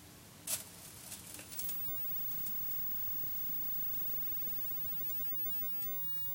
Old-style cathode-ray-tube (CRT) TV turning on. A little flicker and a high tone you hear when these TVs are switched on.